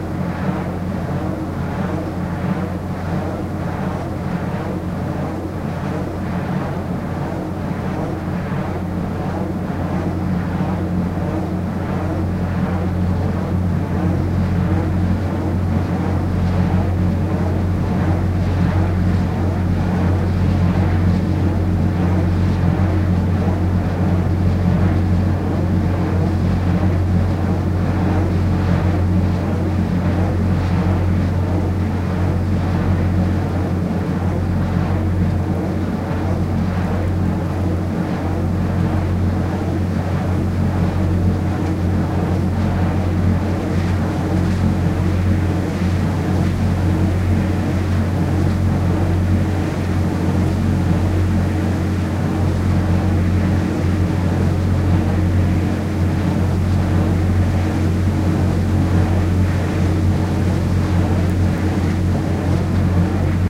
Windrad - Parsteiner See - 201110
Wind wheel close-by lake Parstein. Germany (County Brandenburg).
lake-parstein, wind-engine, wind-wheel